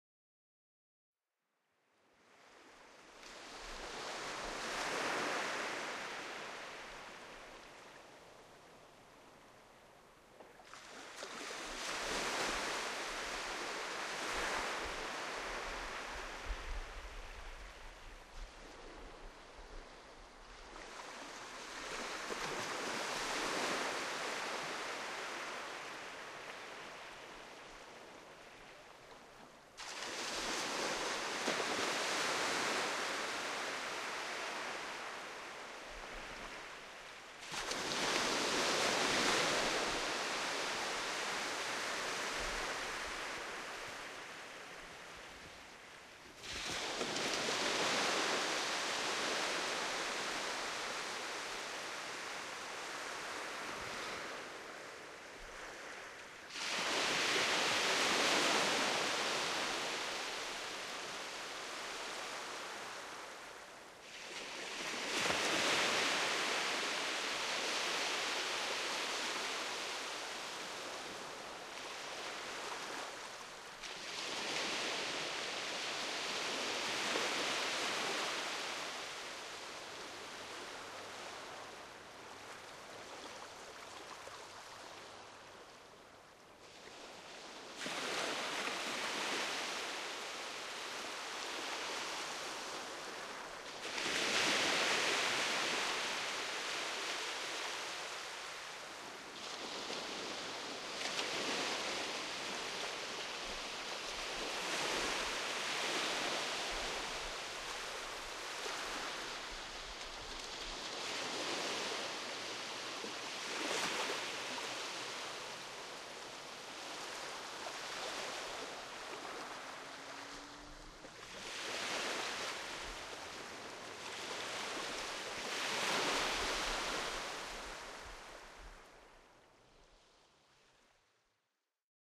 The sound of gentle beach waves recorded in Jersey, (Channel Islands).
Recorded on a Sharp MD SR-40H mini disc with a Audio Technica ART25 stereo microphone.